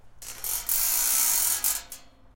Recorded with a Sony PCM-D50.
Sliding a pencil across a radiator would sound like this.
texture, pencil, radiator, strike